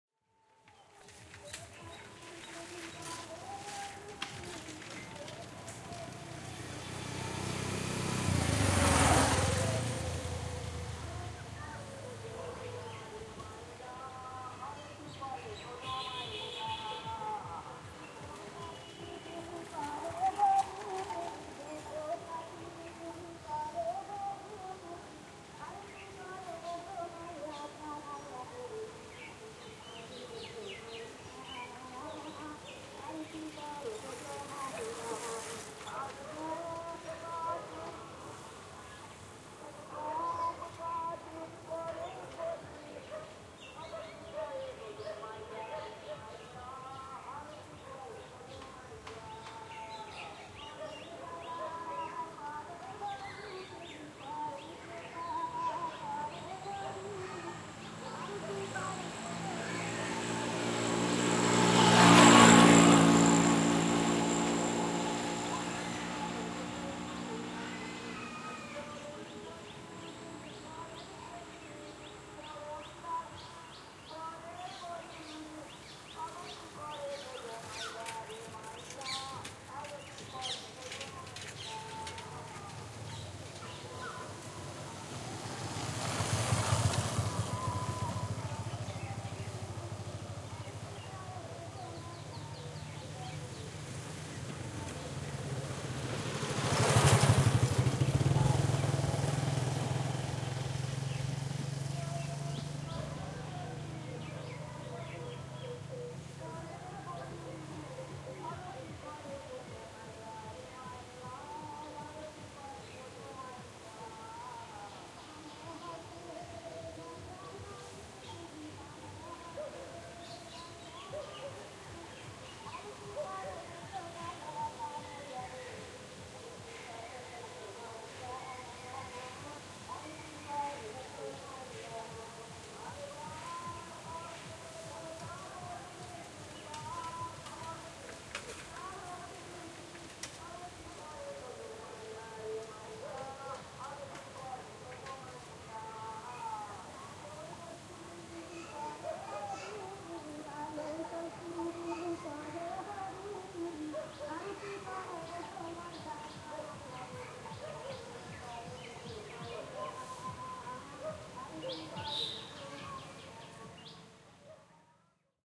Rural outdoor ambience, afternoon light wind vehicles pass bys and distant loudspeaker
An afternoon recording in a small rural place in Kolkata, India. Some loudspeaker song was heard from a distance.
Recorded with Zoom H5
field-recording, birds, ambience